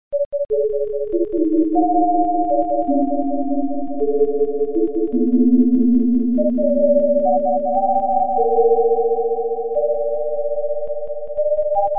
Analog style synthesizer patch. Sound produced using my own JSyd software.